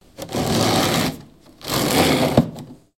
G9 moving heavy furniture
a man moves a heavy furniture inside a living room